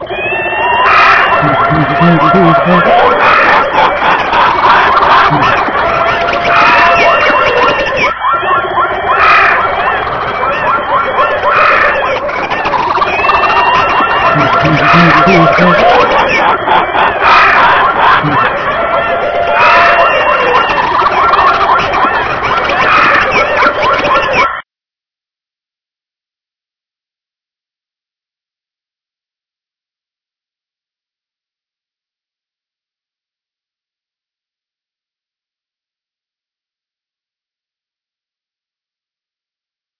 jungle birds GREAT
A huge sound from a disturbed jungle at night or birds and critters at their best